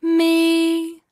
Solfege - Me
Warming up. ME! C4. Recording chain Rode NT1-A (mic) - Sound Devices MixPre (preamp) - Audigy X-FI (A/D).
female, singing, me, voice, solfege, vocal, vox